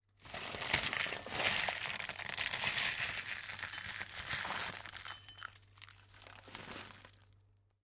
15 Sprinkling the crisps into the bowl
CZ, Czech, Pansk, Panska